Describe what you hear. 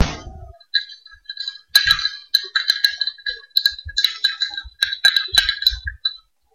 for the second sound I shake ice cubes in a glass, I amplify the sound and reduce the height.